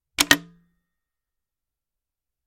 old bakelite telephone press hook
The sound of an old bakelite telephone, the hook is pressed.
Recorded with the Fostex FR2-LE recorder and the Rode NTG-3 microphone.